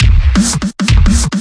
Heavy EarthShake
Very heavy breakbeat for drum and bass 170bpm
compressed, drum